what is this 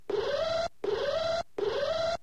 fan alarm loop 2
Loop that sounds like an alarm made from broken fan noises. This is part of a pack that features noises made by a small malfunctioning house fan that's passed its primed.
abuse, alarm, broken, defective, domain, fan, malfunction, public, squeal